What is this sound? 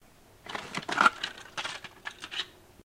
Medication tablet box
box, cupboard, dosage, ill, Medication, medicine, pill, pills, poorly, sick, tablet, tablets, unwell